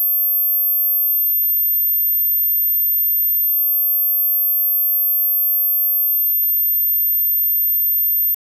A request by "gavory", a sine wave at about 13500 Hz to simulate ringing ears after a loud explosion.